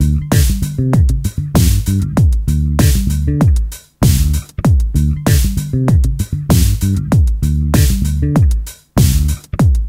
PHAT Bass&DrumGroove Dm 3
My “PHATT” Bass&Drum; Grooves
Drums Made with my Roland JDXI, Bass With My Yamaha Bass
Ableton-Loop, Compressor, New-Bass, Bass-Samples, Logic-Loop, Beat, Groove, jdxi, Funky-Bass-Loop, Soul, Drums, Synth-Bass, Fender-PBass, Funk, Bass-Recording, Bass, Ableton-Bass, Hip-Hop, Fender-Jazz-Bass, Synth-Loop, Bass-Groove, Bass-Loop, Bass-Sample, Jazz-Bass, Funk-Bass, Loop-Bass